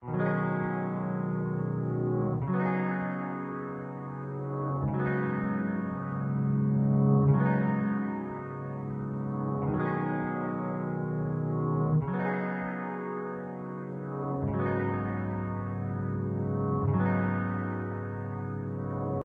Piandolin chord progression - WET FX VERSION
Mandolin + reversed Piano Chord Progression Loop
D69-E9sus4-Bminor11-Bminor11 played twice @120bpm detuned to 100bpm
This is the mastered file with phaser, chorus, surround reverb, & stereo widening FX baked in.
reverb, phaser, music, Mandolin